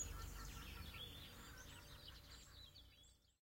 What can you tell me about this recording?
amb - outdoor rooster cows flies hits
birds, field-recording